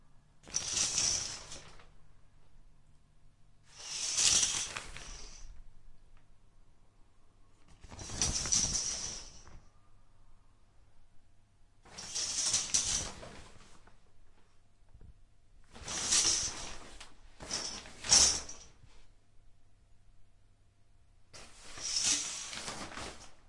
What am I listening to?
A nasty plastic shower curtain being pulled and swished around in a real bathroom. This is the "combination" shower and bath type used to stop water getting all over the floor. Several swishes are included in the sample.